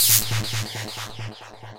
The first and last part of my fm spark effect with a multi tap delay. Great for your favorite mad scientist's dungeon workshop.SoundForge8
effect, loop